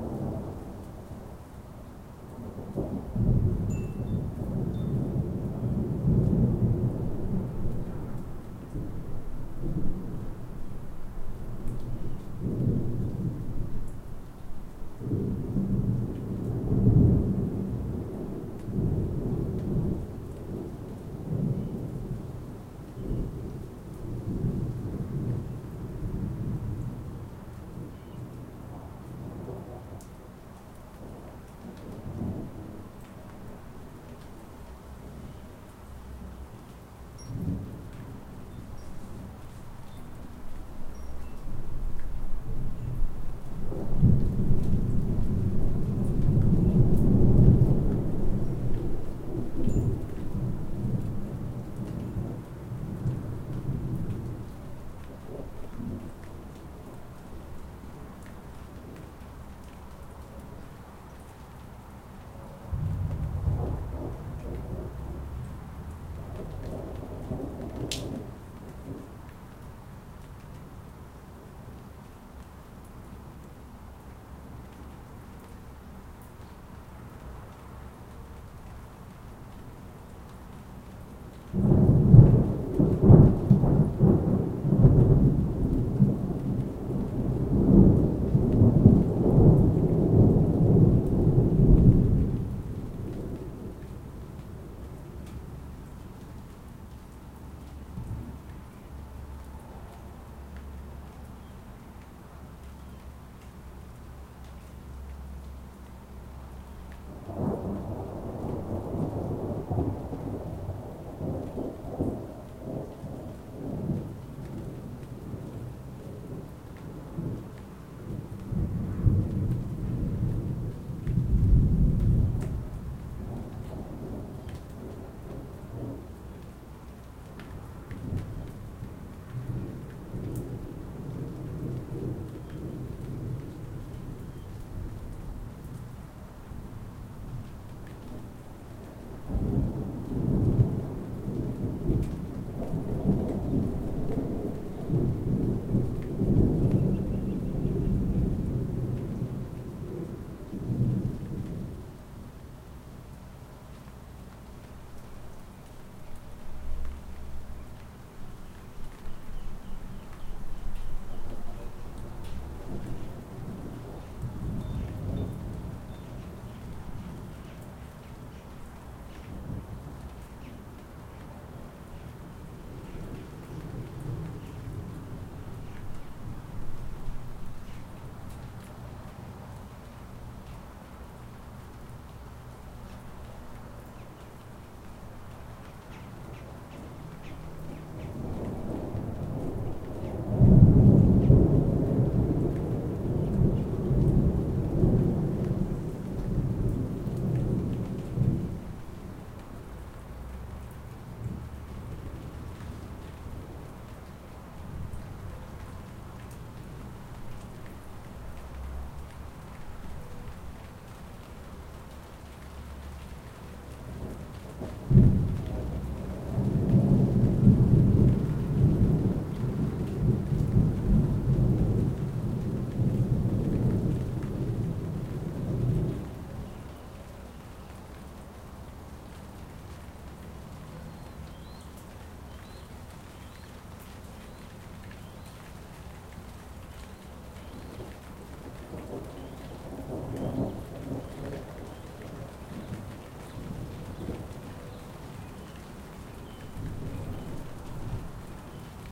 USB mic direct to laptop, some have rain some don't.
storm, field-recording, thunder